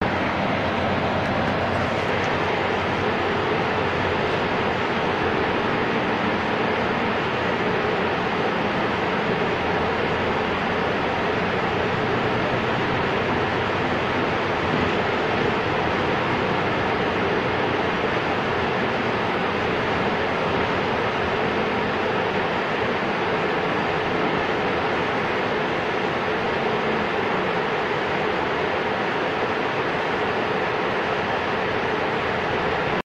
Ambience inside trolleybus 33sec record20160107211216
Ambience inside trolleybus. Recorded with Jiayu G4 for my film school projects. Location - Russia.